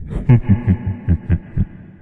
Mischievous Laugh 3
Sound of a man laughing mischievously with Reverb, useful for horror ambiance